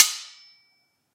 Sword Clash (32)
This sound was recorded with an iPod touch (5th gen)
The sound you hear is actually just a couple of large kitchen spatulas clashing together
slash, swords, hit, ping, ringing, sword, steel, clanging, clank, slashing, clashing, ting, struck, strike, metal-on-metal, stainless, clash, clang, impact, metallic, ring, knife, metal, iPod, ding